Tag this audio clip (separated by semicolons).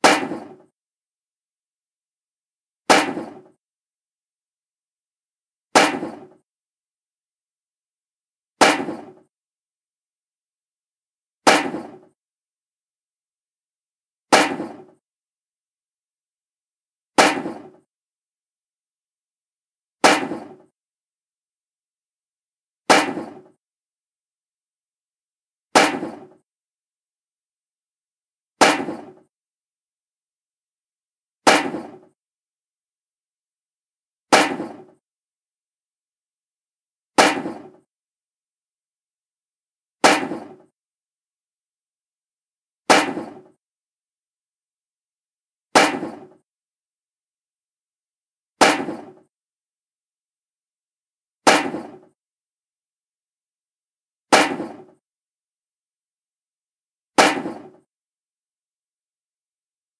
Table; Sydney; Slam; Ringtone; City; 21; Salute; Australian-Government